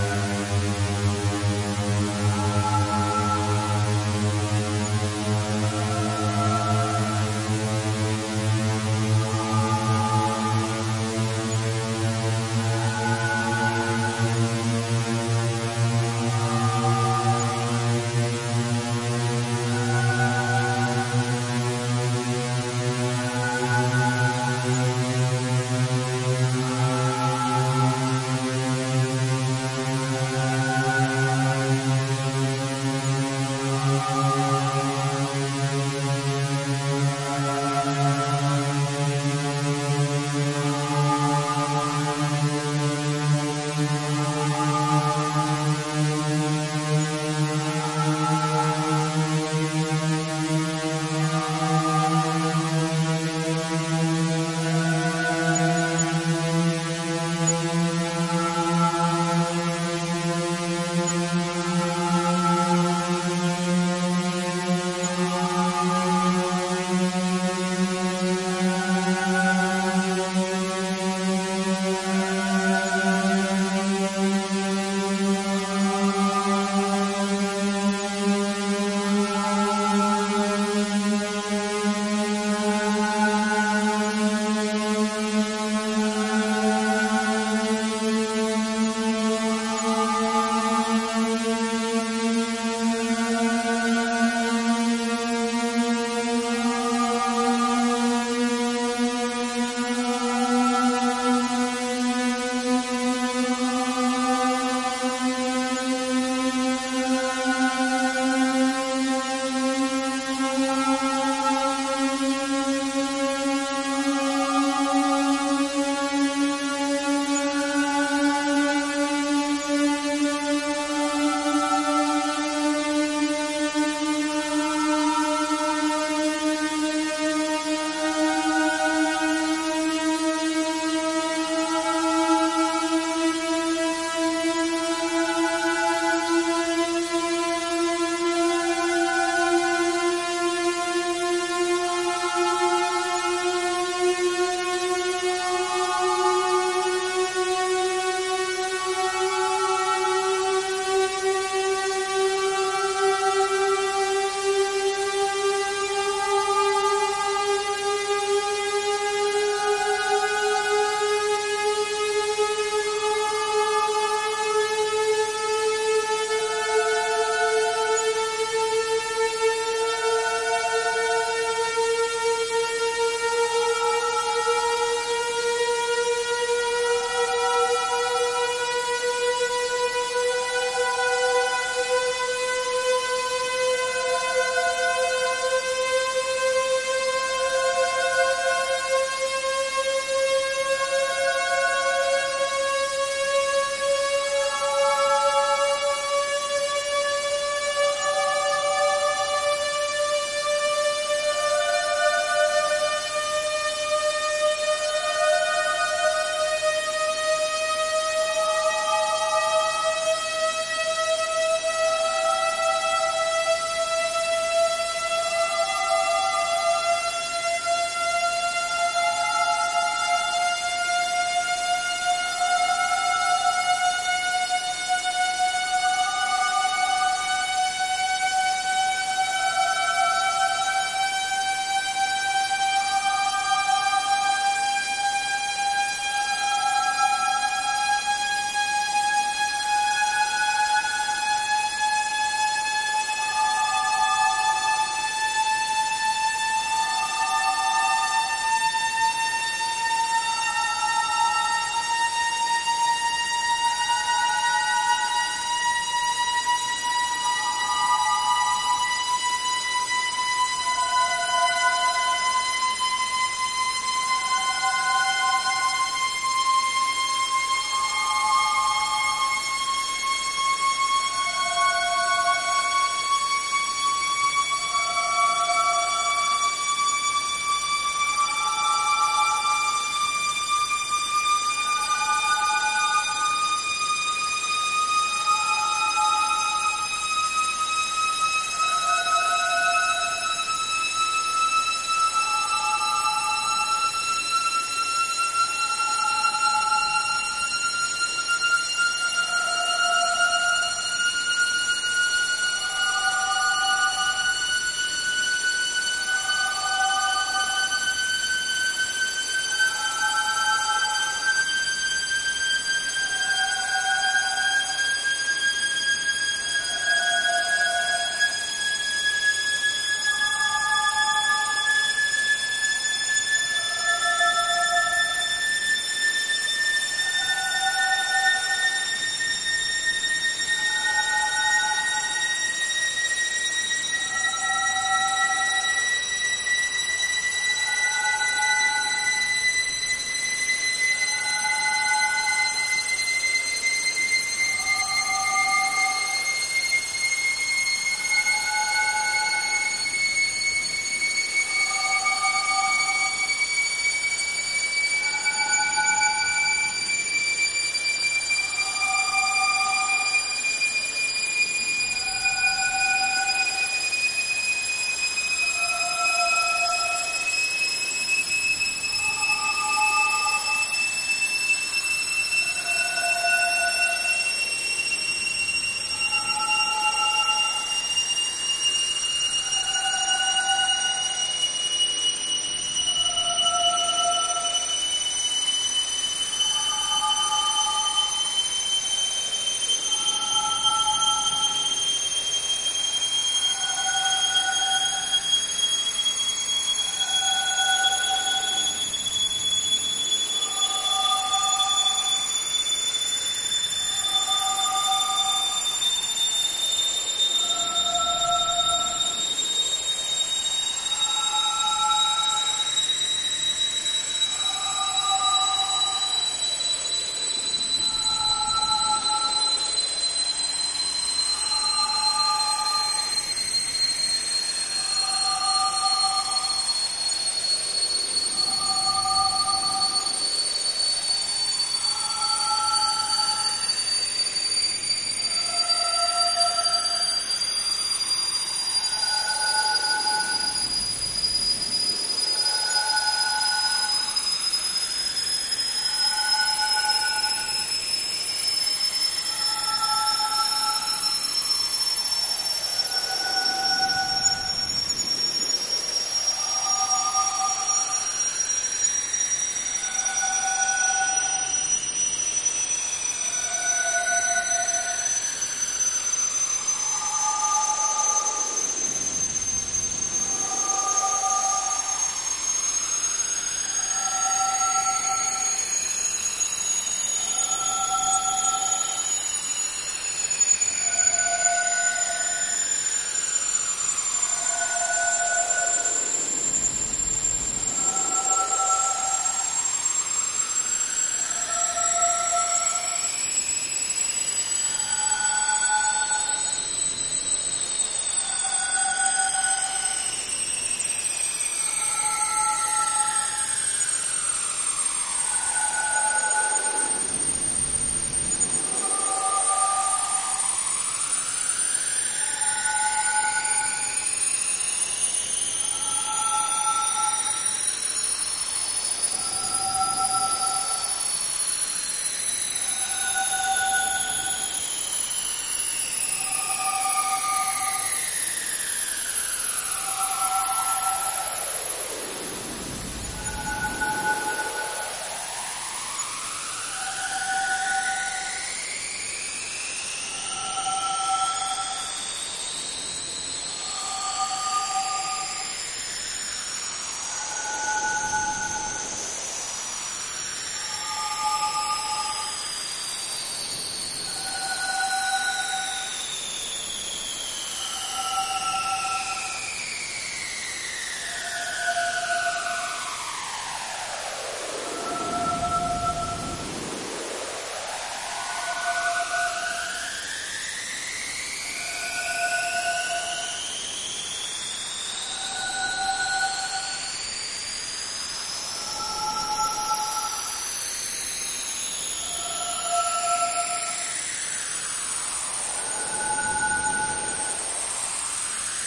SFX loud spaceship engine, increasing pitch
A loud sound that sounds like the engine of a spaceship, or sort of like a Formula One car. Created with Audacity. I created this sound for my movie "Forevers 2: Age of Teeth".
car, formula-1, loud, spaceship, engine